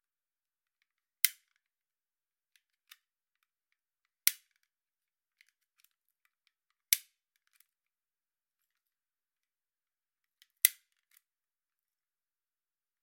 Stapler Manipulation
Pressing a stapler and then pausing before pressing the stapler again. This is the manipulated file.